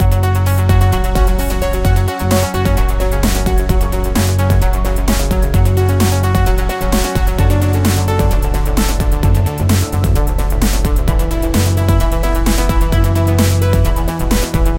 Space Synth
A somewhat relaxing modern loop available for your projects.
Made using FL Studio. I used reFX Nexus for the synths and Drumaxx was for the percussion in the background.
drum, beat, loop, drums, percussion-loop, space, 130-bpm